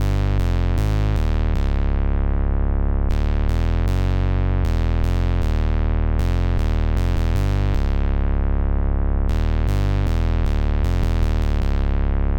new joint2 low.R
square bass loop
bass, bassline, drum, jungle, loop, loops, rough, square